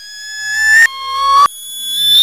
Rising Tone
A 'C' note reversed, reverbed and with a pitch increase. Did it three times changing the 'C' note to G#, A among others.
c, increase, pitch, up